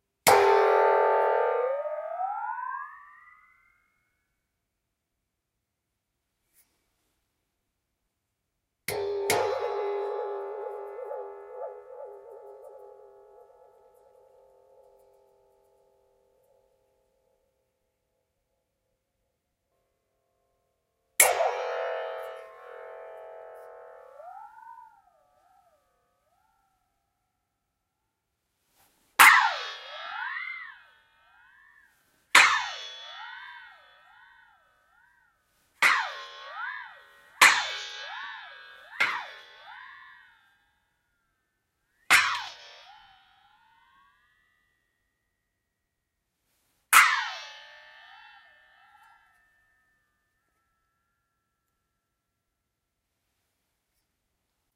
Two Man Saw - Single Hits 2
1.5 meter long crosscut two-man saw with wooden handles being hit at different strength levels, various hit tail alterations and manipulations as the body of the saw is being bent or shaken. Occasional disturbance in the left channel due to unexpected recording equipment issues.